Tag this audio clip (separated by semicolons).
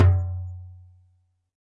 African; Darabuka; Djembe; Doumbec; drum; dumbek; Egyptian; hand; Middle-East; percussion; Silk-Road; stereo; Tombek